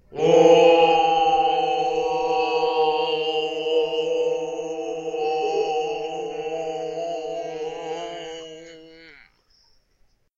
STUPID OOOOOOOOOHM CHOIR
stupid sound made by layering tracks of me saying "ooooooooooooohm" in Audacity. All with a, you guessed it, CA desktop microphone.